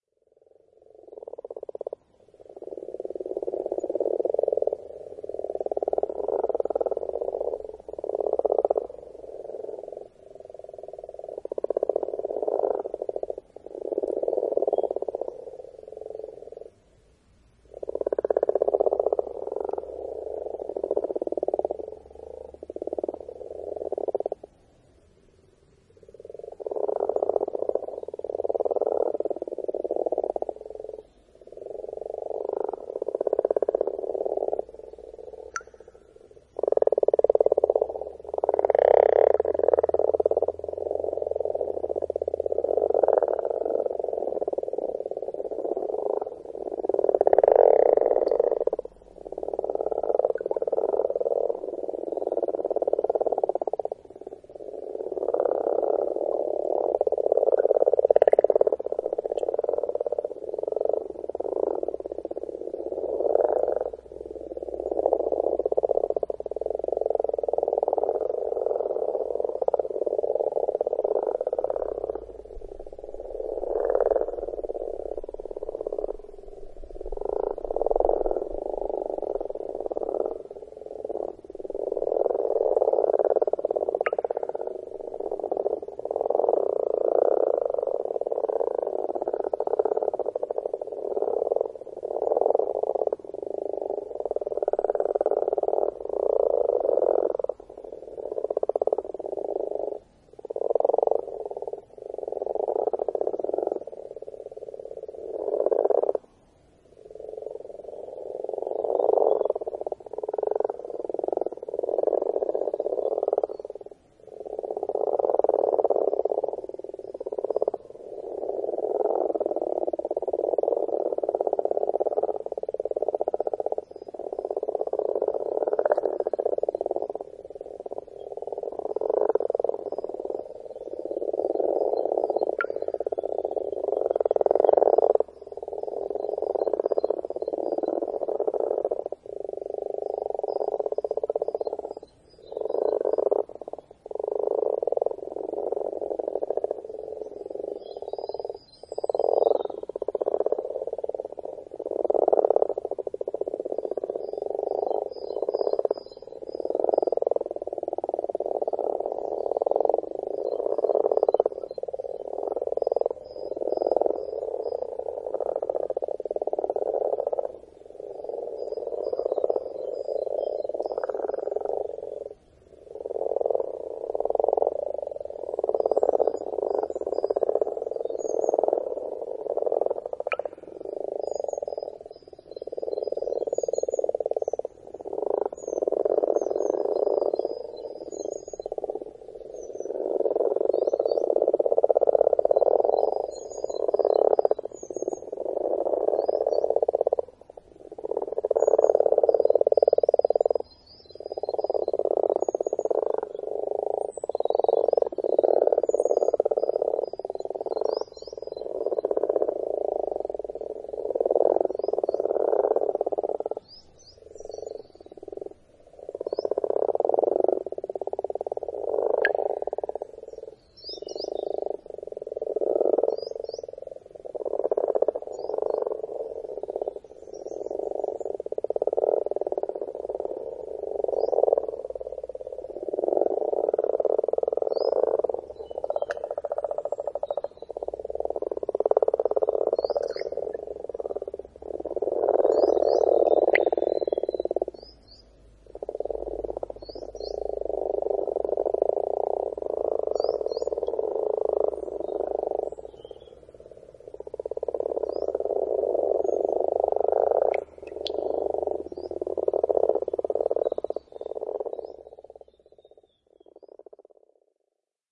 Frogs In A Pond Close
A stereo field-recording of frogs (Rana temporaria) croaking at spring in a garden pond very close mic'd ( to the point of distortion, but I included it in case it might be of interest ). Lavalier mic (unknown make) > Sharp MD